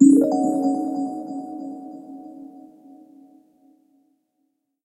Dreamy bells
A dreamy bell sound that would be played as a transition to a flashback or a dream.